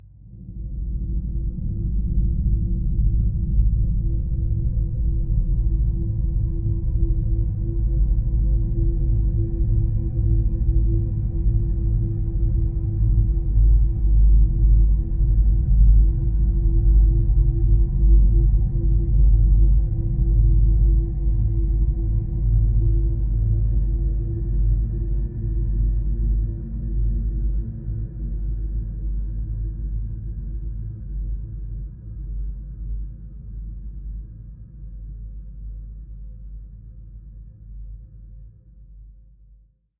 LAYERS 023 - Thin Cloud-20
LAYERS 023 - Thin Cloud is an extensive multisample packages where all the keys of the keyboard were sampled totalling 128 samples. Also normalisation was applied to each sample. I layered the following: a thin created with NI Absynth 5, a high frequency resonance from NI FM8, another self recorded soundscape edited within NI Kontakt and a synth sound from Camel Alchemy. All sounds were self created and convoluted in several ways (separately and mixed down). The result is a cloudy cinematic soundscape from outer space. Very suitable for soundtracks or installations.
space, soundscape, cinimatic, cloudy, pad, multisample